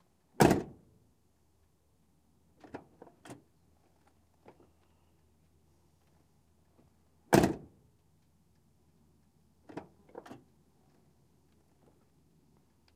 Driver's side door opened and closed.